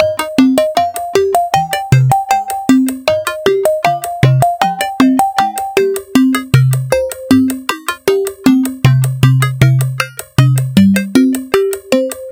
20140525 attackloop 78BPM 4 4 Analog 2 Kit mixdown6
This is a loop created with the Waldorf Attack VST Drum Synth. The kit used was Analog 2 Kit and the loop was created using Cubase 7.5. Each loop is in this Mixdown series is a part of a mixdown proposal for the elements which are also included in the same sample pack (20140525_attackloop_78BPM_4/4_Analog_2_Kit_ConstructionKit). Mastering was dons using iZotome Ozone 5. Everything is at 78 bpm and measure 4/4. Enjoy!
electronic, electro, dance, rhythmic, 78BPM, minimal, loop